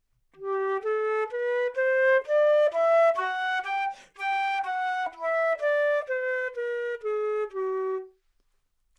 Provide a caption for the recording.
Flute - G major - bad-pitch-staccato
Part of the Good-sounds dataset of monophonic instrumental sounds.
instrument::flute
note::G
good-sounds-id::7075
mode::major
Intentionally played as an example of bad-pitch-staccato